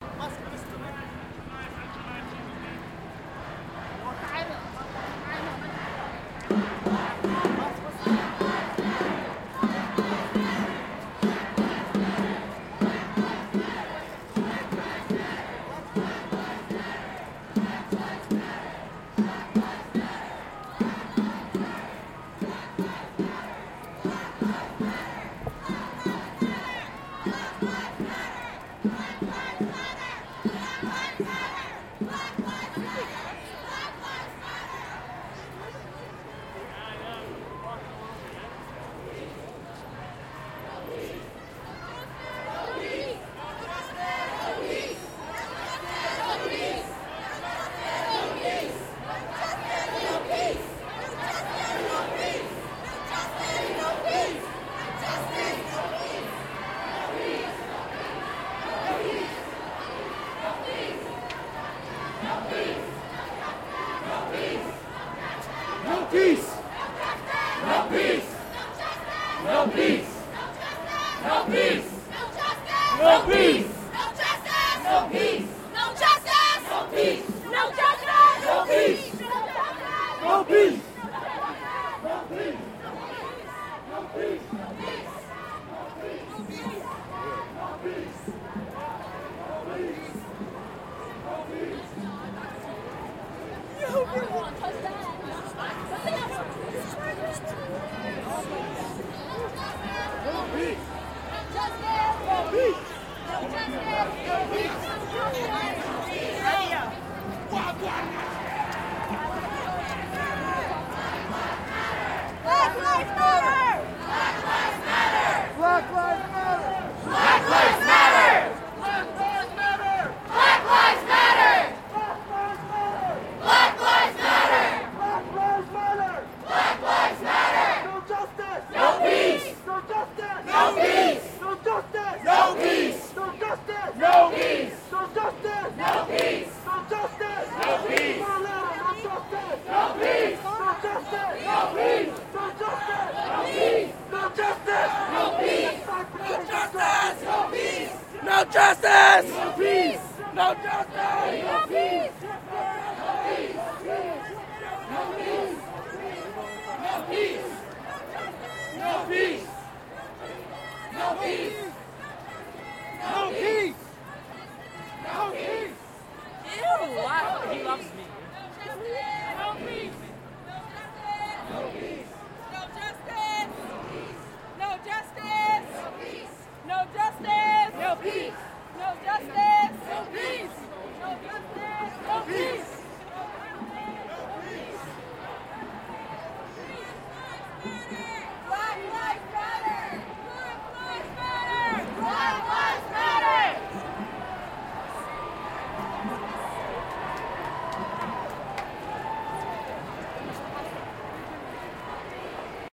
Crowd Protest March passing for Black Lives Matter 2020 in Toronto
contact for raw audio
toronto, hands-up-dont-shoot, no-justice-no-peace, black-lives-matter, crowds, protest, march, field-recording, 2020